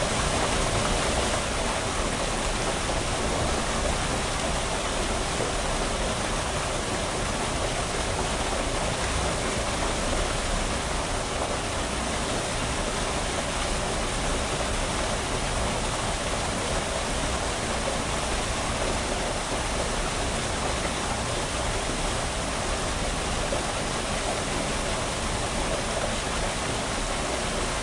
well water surge sewer nearby
recorded with Sony PCM-D50, Tascam DAP1 DAT with AT835 stereo mic, or Zoom H2